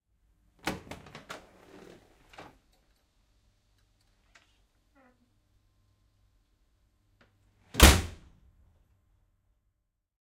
Tilt Train Door Open/Close 1B
Recording of a manual door being opened and closed on a tilt train.
Recorded using the Zoom H6 XY module.
opening, slam, train, close, door, open, closing